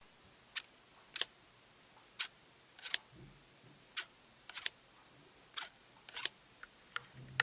Opening and closing scissors
Opening and closing a pair of scissors multiple times without actually snipping something. Great audio clip.
open, opening